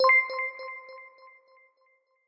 buttonchime02up
Reverberated with delay.